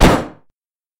Getting hit in a video game. Overprocessing an own recording.
Edited with Audacity.
Plaintext:
HTML:
adventure, hit, pain